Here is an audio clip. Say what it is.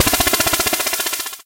cool retro helicopter sound